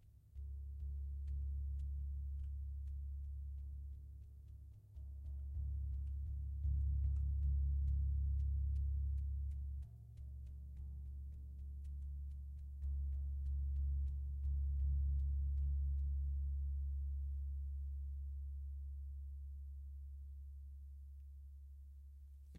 Bwana Kumala Gong 02
University of North Texas Gamelan Bwana Kumala Gong recording 2. Recorded in 2006.
bali, percussion